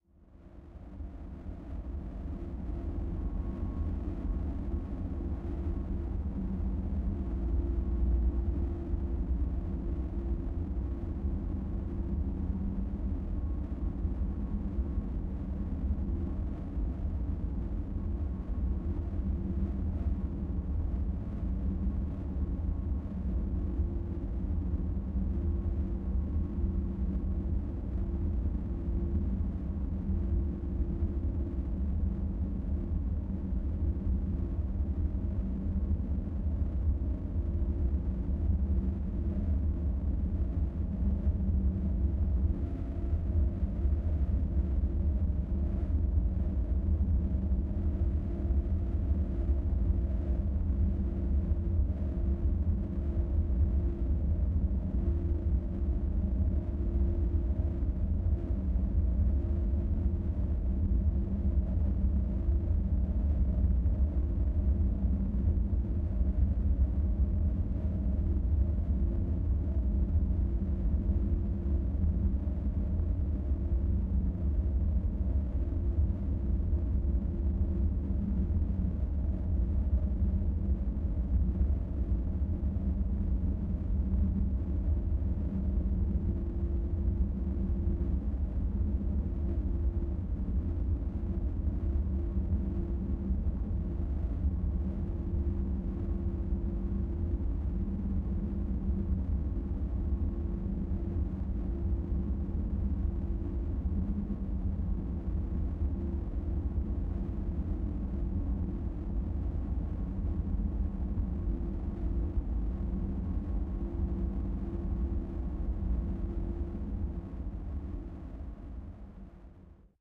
drone record in city and processed